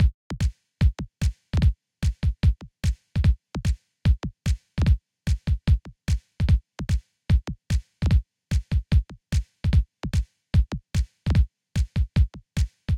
148 Sidekick.Drums
This one is made by the Bitwig Studio Drummachine. It´s a fast one if you want to play it in the original tempo.